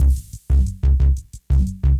Lofi recording, analog Yamaha MR10 Drum Machine raw beat. 80's classic drum machine.